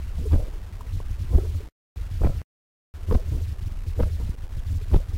wings low
Big wing closeup, it's not a clean recording but a great start point audio for using in post production.
bass, flap, low, wing